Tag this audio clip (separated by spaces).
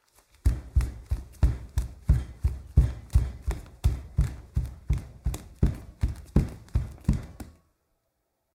barefoot footsteps hardwood running wood